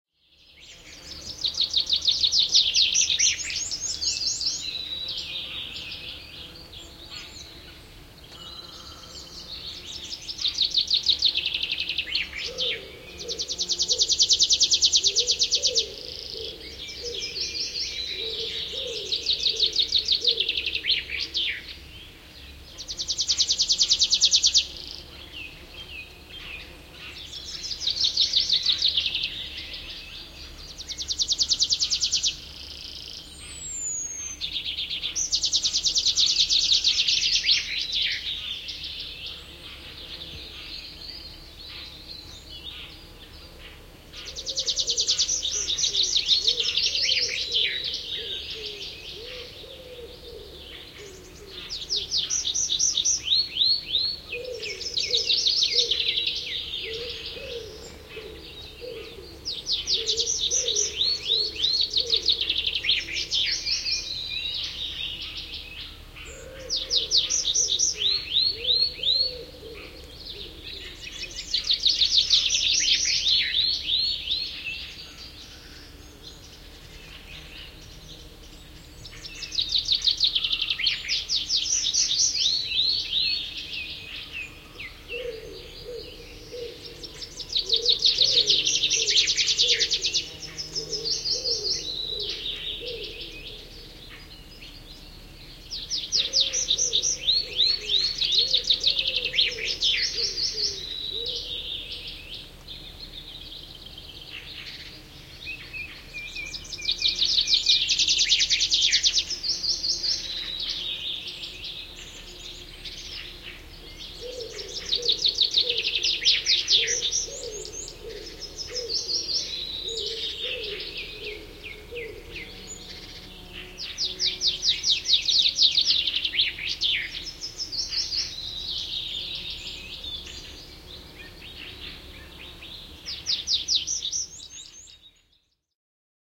Linnunlaulu, lintuja metsässä / Birdsong, birds singing lively in the woods in the early summer, e.g. chaffinch, tree pipit, wood pigeon
Linnut laulavat vilkkaasti metsässä, alkukesä, mm. peippo, metsäkirvinen, etäällä sepelkyyhky.
Paikka/Place: Suomi / Finland / Lohja, Karkali
Aika/Date: 22.05.1992